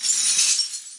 Digging Coins #4
Variation of the first digging coins sound.
agaxly, broken, clash, glass, shards, smash